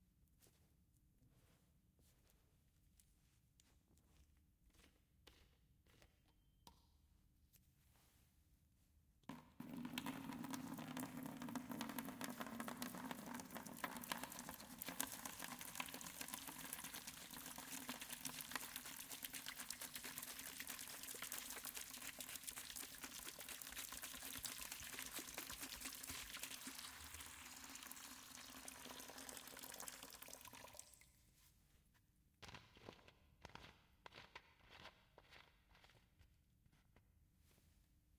pouring Water into a bucket....Recorded using 2 Shure Sm81s .